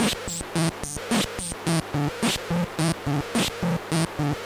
Elek Perc Loop 003 Var6
A synth percussion loop straight from the Mute Synth 2.
Slight tweaks to knobs produced a new variant.
REcorded straight into the laptop mic input.
No effects or post-processing. Simply cut and trimmed in Audacity.
Mute-Synth-2, Mute-Synth-II, electronic, loopable, rhythm, rhythmic, seamless-loop